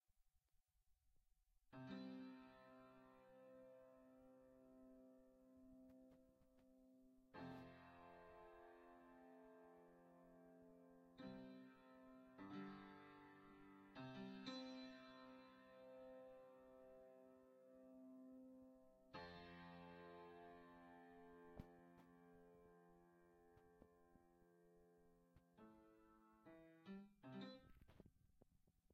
Playing basic chord progression on an upright piano in a small practice room.